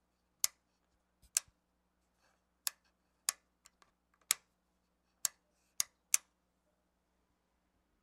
toggle switches 3
Chromium toggle switches from an old Videotek 2 Channel studio talkback studio monitor. Sennheiser ME66 to M Audio Delta